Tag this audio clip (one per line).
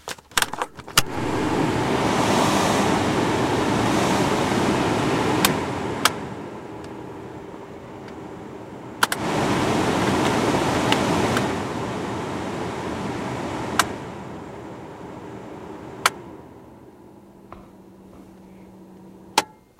car
heating
cold
blowing
blower
ventilation
air
conditioning
wind